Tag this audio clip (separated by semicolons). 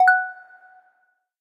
bell; Synth; Video-Game